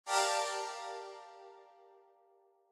Button sound effect for game development menus or other interfaces. Menu item selection sound or go back button sound.
interface-button, video-game-button, video-game, website-button, game